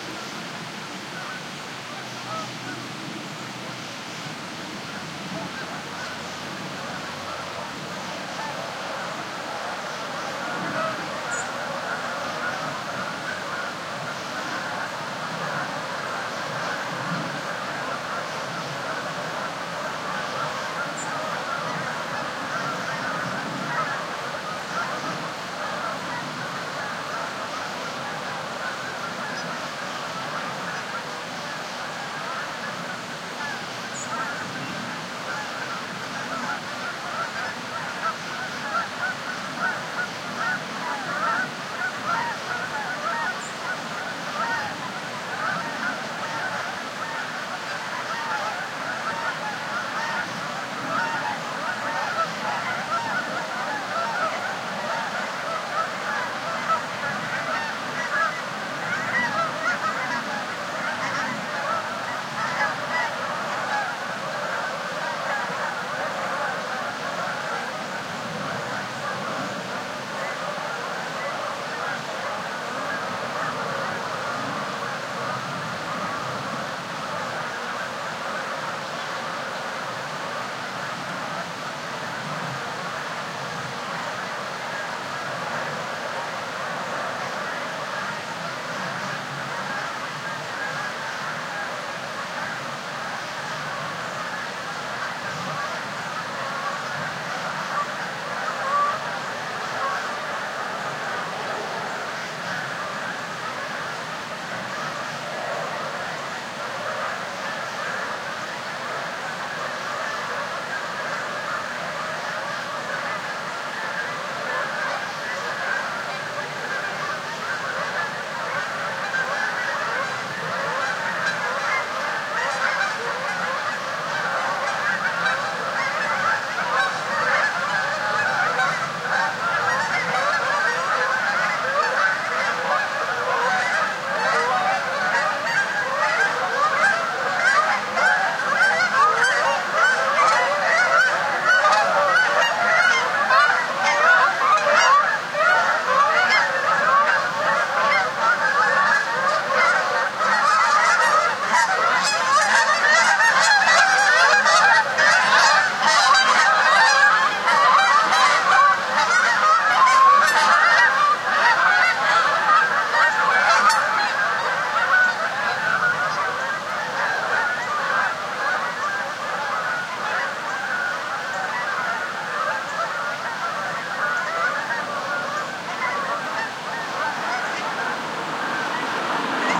geese copake farmland 2
Rural ambience and increasingly loud Canadian geese (Branta canadensis) near the hamlet of Copake Falls, in rural upstate New York, USA, in October, at dusk. Geese gather and call to one-another in the distance, and their activity comes closer and becomes louder as they fly by directly overhead. Crickets and other nocturnal insects chirp in the nearby vicinity. In the distance, the rapids of a stream and far-off highway traffic. A car approaches in the final seconds of the recording.
High-pass EQ and very moderate compression applied. Recorded with a Sony PCM-D50 with mics in their wide position.
I also have a version of this file that has not been EQ'd or compressed, which preserves more of a low-frequency roar in the background.
(2 of 2)
new-york, evening, farmland, crickets, ambient, rural, canadian-geese, geese, columbia-county, copake, ambience